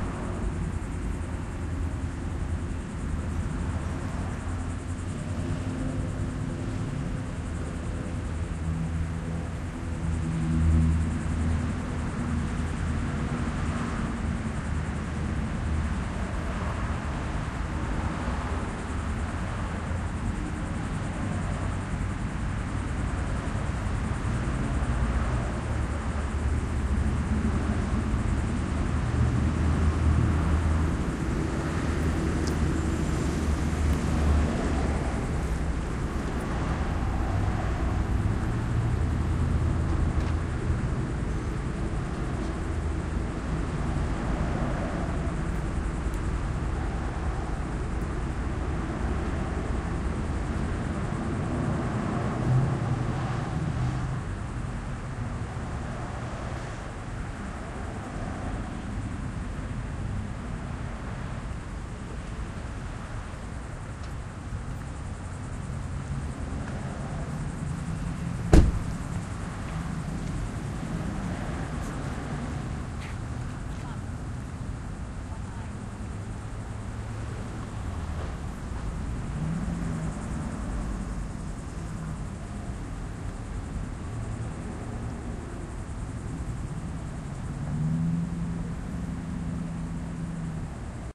Sounds of the city and suburbs recorded with Olympus DS-40 with Sony ECMDS70P. Sounds of the street and passing cars from farther back from the street.